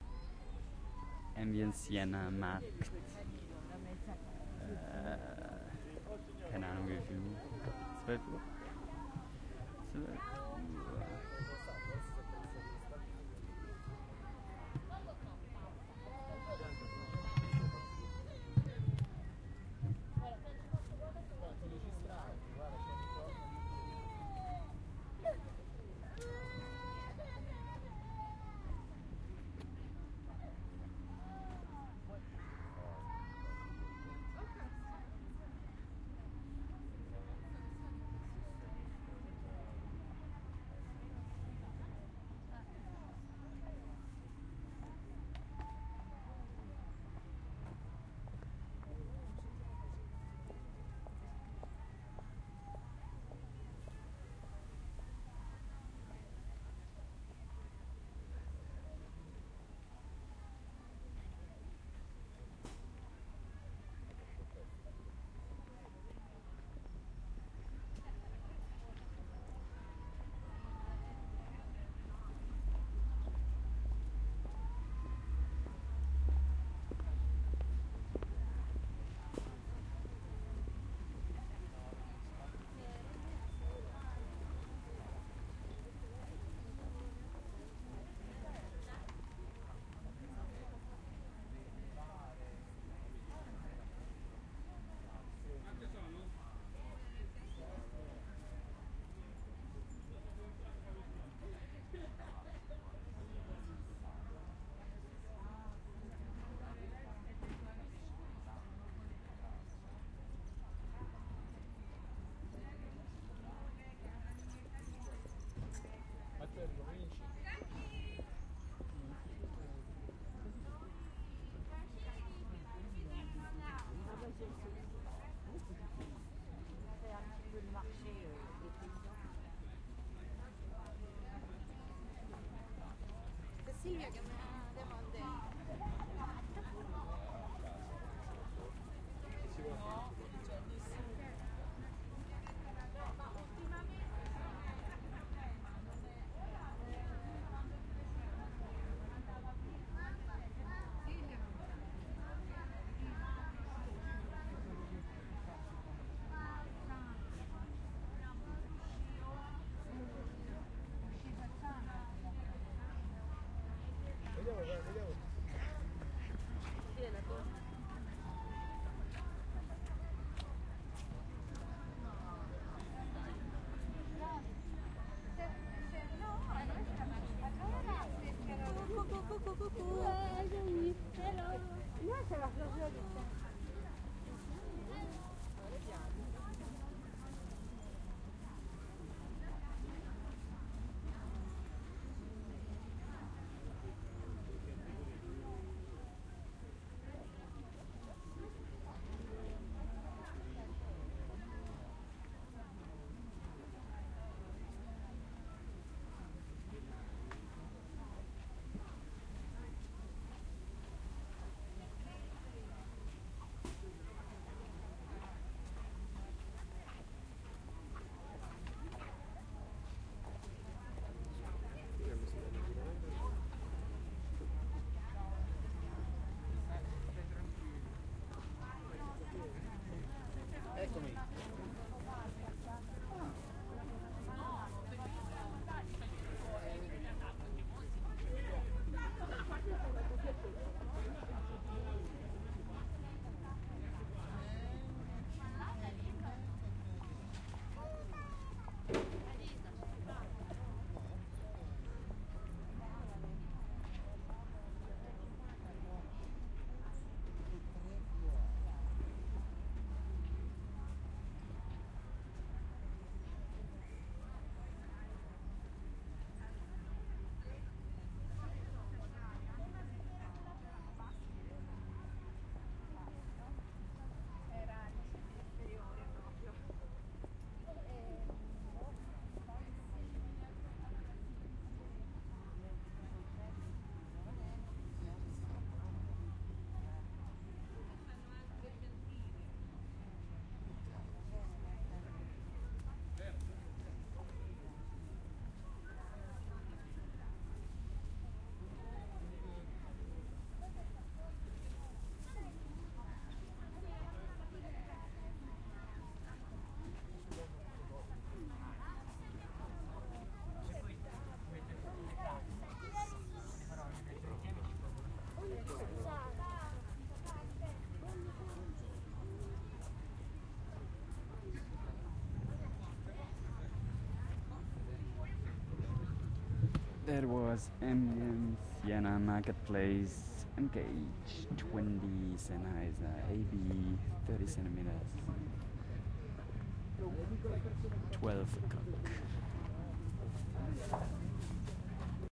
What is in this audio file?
2012-11-01 AMB - SIENA MARKET
Ambience, Field, Italy, Market, Recording, Siena